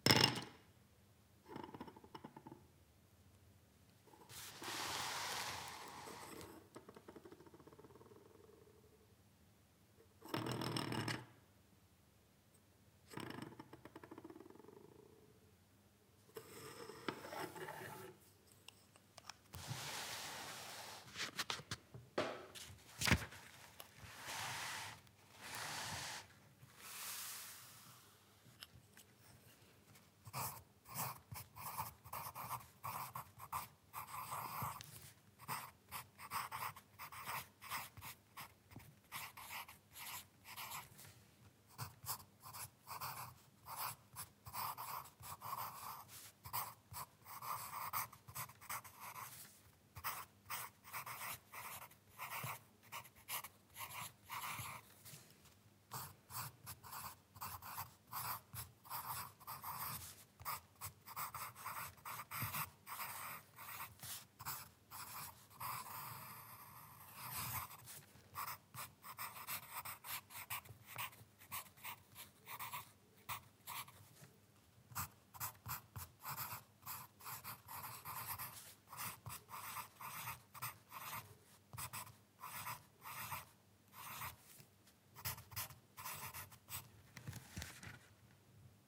Writing on paper using a lead pencil. Dropping the pencil, picking it up, moving the paper, etc etc
Microphone: DPA 4017 (hypercardiod)
Writing, Pencil, Paper